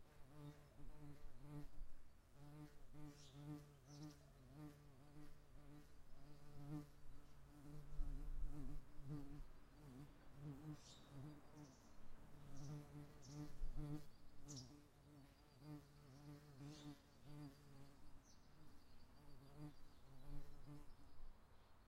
Buzzing Insect
An insect I'm pretty sure was a bee was curious about a newspaper so I dropped my Zoom H1n nearby and it took an interest. A fairly loud bird was chirping nearby.